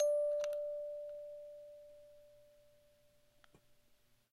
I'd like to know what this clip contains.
MUSIC BOX D 1
1st In chromatic order.
music-box, chimes